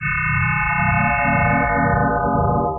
A Star Trek-like teleporter sound